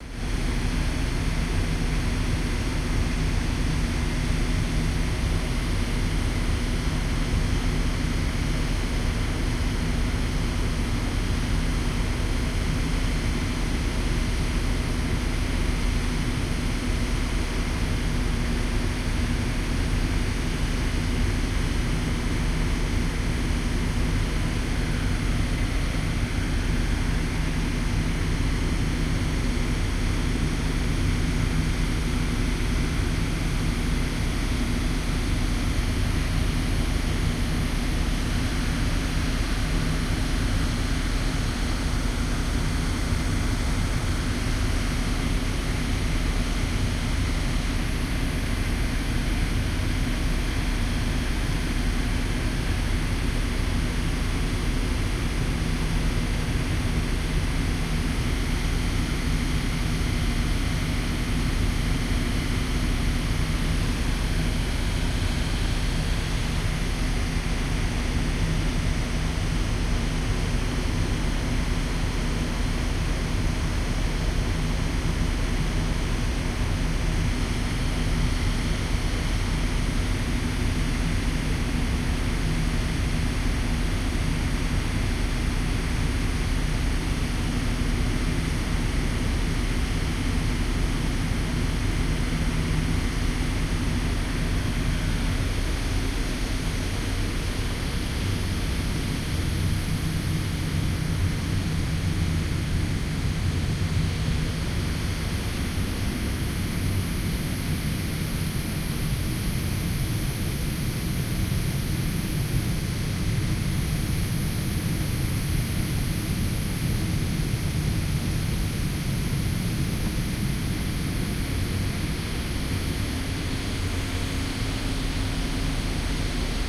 Cruiseship - outside, at the funnel next to main engine intake (engine sound, hissing air). No background music, no distinguishable voices. Recorded with artificial head microphones using a SLR camera.